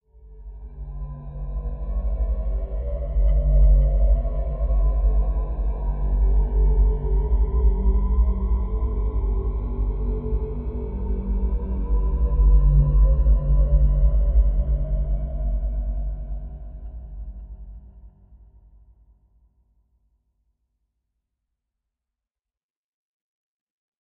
deep china pad 01
re-edit of this sound::
ran it through fl studio. pitched it down 2 octaves, oviously faded the in and out, put a phaser & flanger on it, filtered it, and 2 delays at different times.
alien, dark, deep, evil, horror, sci-fi, sinister, space, spooky, suspense